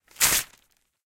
Tearing, Newspaper, B
Raw audio of rapidly tearing a sheet of newspaper. The metro has its uses.
An example of how you might credit is by putting this in the description/credits:
The sound was recorded using a "H6 (XY) Zoom recorder" on 11th December 2017.
newspaper, paper, rip, ripping, tear, tearing